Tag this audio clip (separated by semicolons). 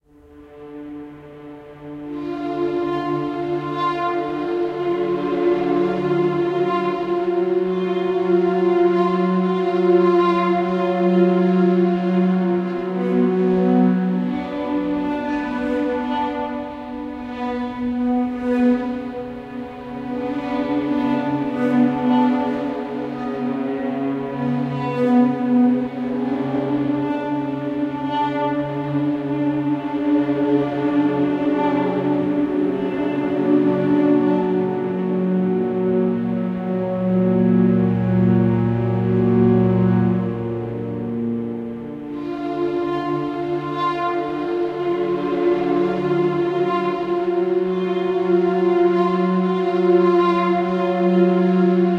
sinister; haunted; movie; background-sound; atmosphere; suspense; thrill; drama; music; orchestral; dark; dramatic; phantom; mood; background; strings; atmo; creepy; terrifying; spooky; slow; sad; film; weird; cinematic; terror; scary